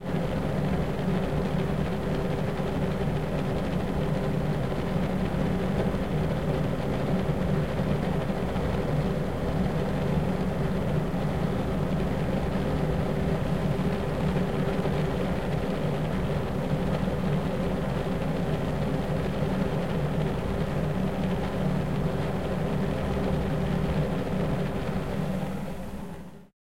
AMBLM air conditioner bathroom
The air conditioner unit of a small bathroom.
Recorded with a Zoom H4N XY
creepy, fan, roomtone